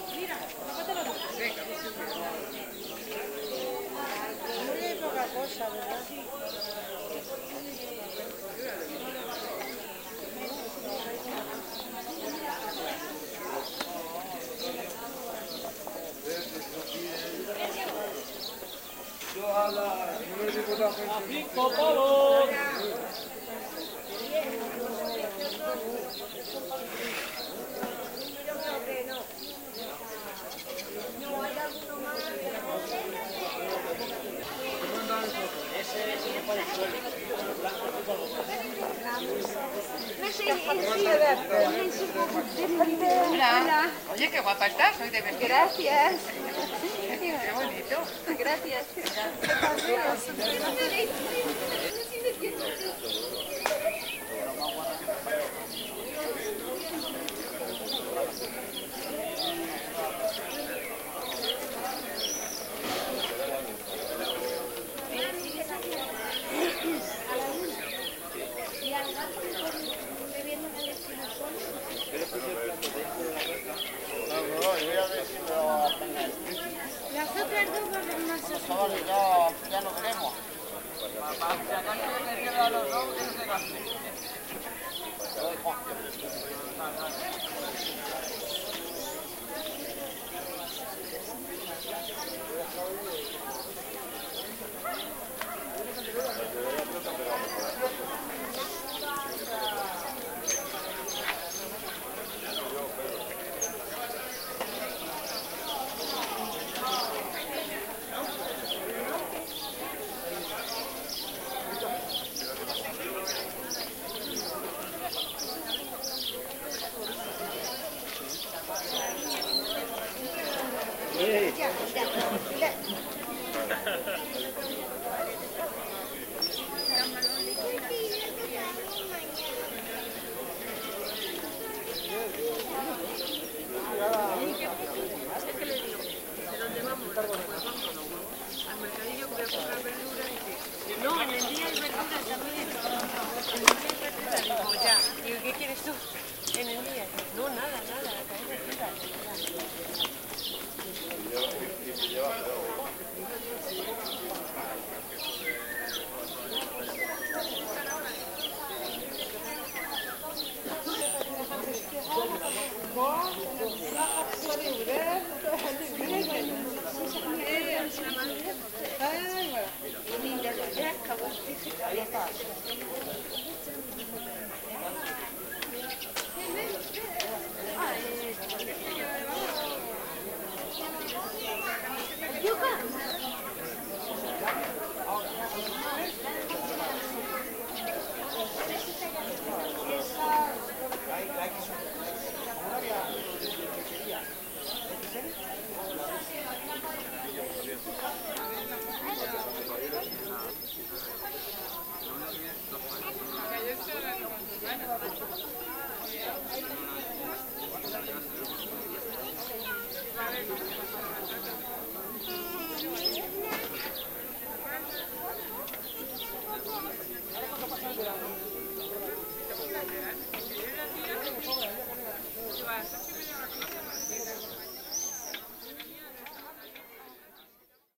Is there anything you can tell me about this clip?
Mercado :: Market
Mercado semanal en una calle del pueblo, conversaciones de personas, fondo de pájaros.
Weekly market in a village street, conversations of people, birds background.
Grabado/recorded
ZOOM H2 + SENNHEISER MKE 400
La-Adrada, market, mercado, people, Spain, talking, urban-life, urbano